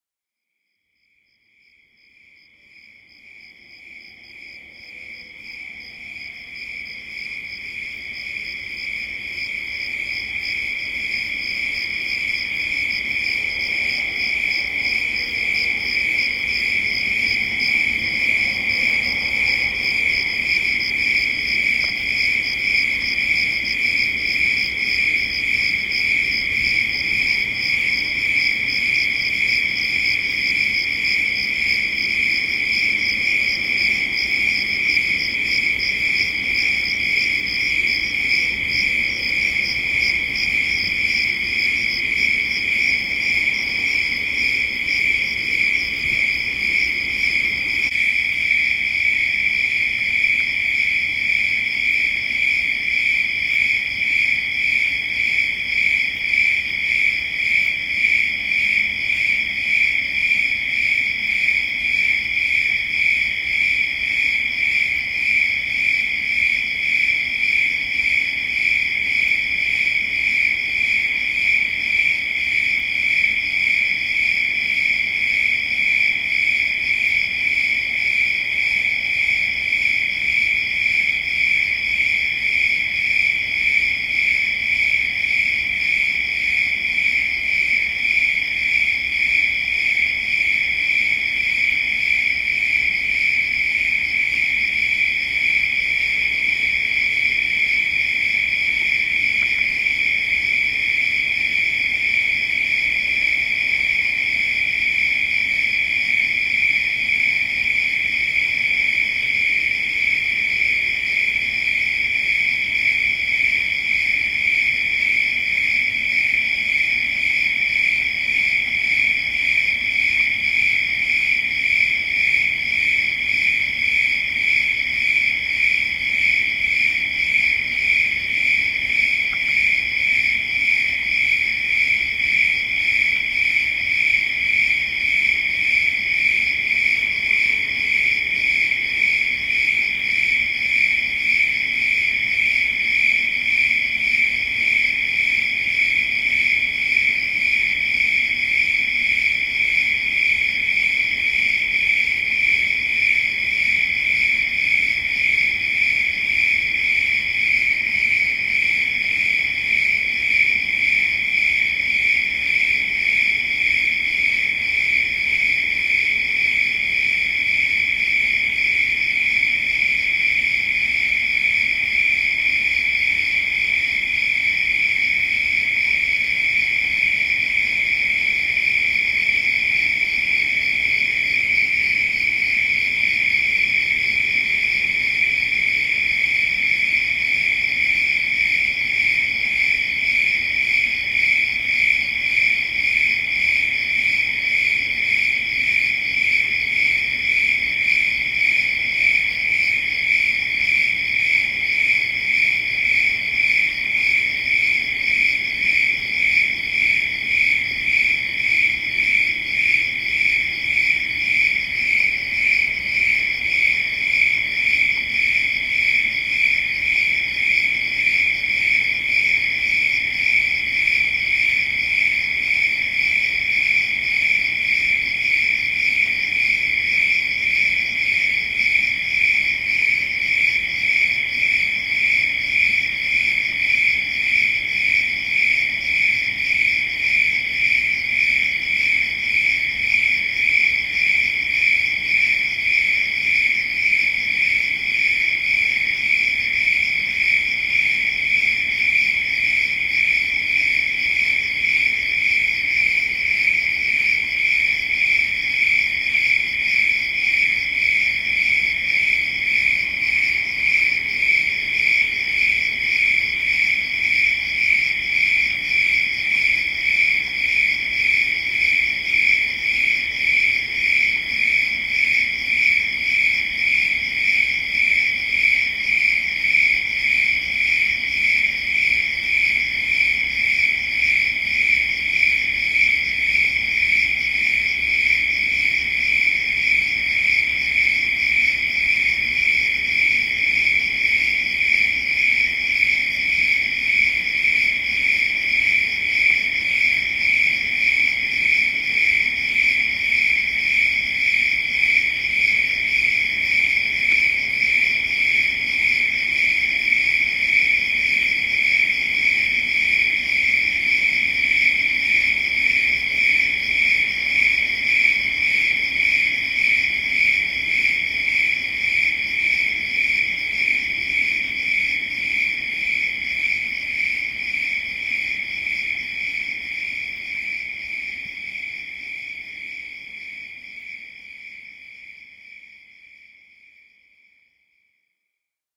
sherman 29aug2009tr20
crickets, sherman-island